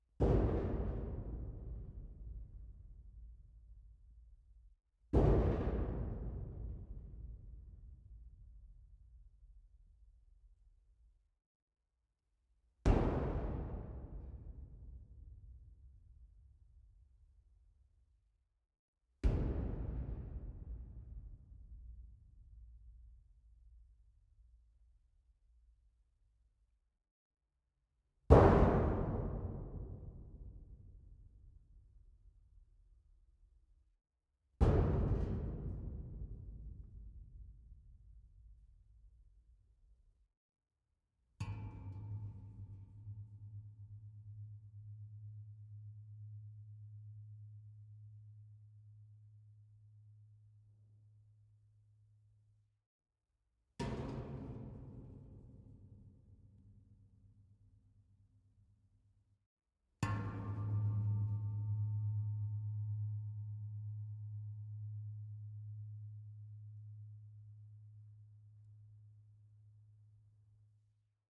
Metal Sheet Cabinet Hit against 50cmx50cmx200cm hollow Part 2
Container, Hit, Metal, Sheet